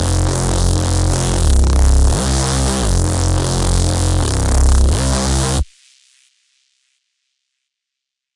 Neurofunk Bass #01
Just a distorted reese. Made this when I was bored.
Neurofunk, Bass, Synth-Bass, Drum-And-Bass